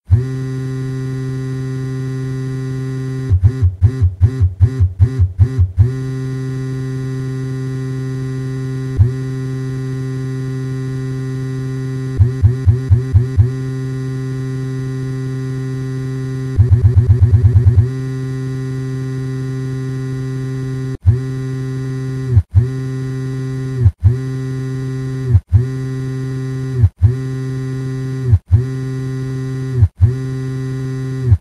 30 seconds of random vibration patterns, can be used to depict a phone's vibration or a vibrator / sex toy.